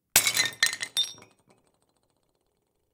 Breaking some old ugly plates and mugs on the floor.
Recorded with Zoom F4 and Sennheiser shotgun mic in a studio.
plate, destroy, crack, shatter, smash, break, breaking, glass